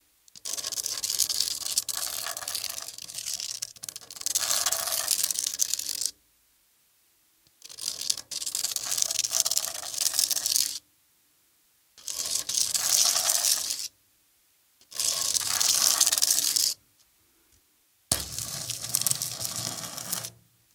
METAL SFX & FOLEY, Long Light Metal scrapes on sand paper
Some metal based sounds that we have recorded in the Digital Mixes studio in North Thailand that we are preparing for our sound database but thought we would share them with everyone. Hope you like them and find them useful.
scrapes, Boyesen, Ed, Sheffield, light, Mixes, paper, Digital, sand, Alex, METAL, long